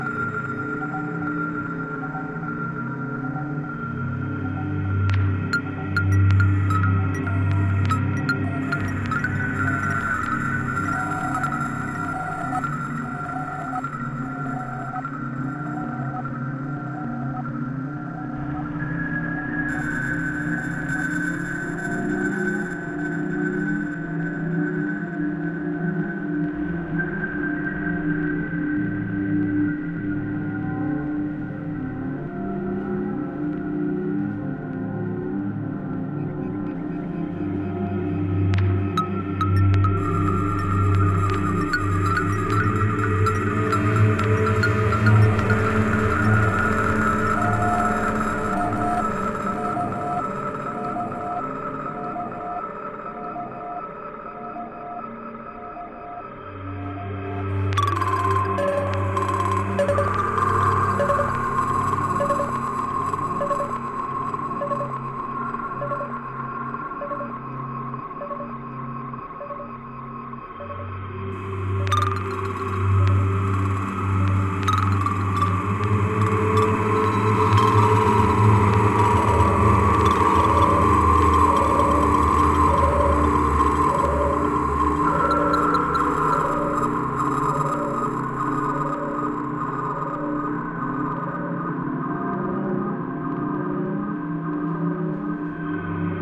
One in a small series of sounds that began as me making vocal sounds into a mic and making lots of layers and pitching and slowing and speeding the layers. In some of the sounds there are some glitchy rhythmic elements as well. Recorded with an AT2020 mic into an Apogee Duet and manipulated with Gleetchlab.
creepy, dark, echo, eerie, glitch, singing, spooky, vocal, voice